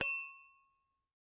Common tumbler-style drinking glasses being tapped together. Hit resonates with a ping. Close miked with Rode NT-5s in X-Y configuration. Trimmed, DC removed, and normalized to -6 dB.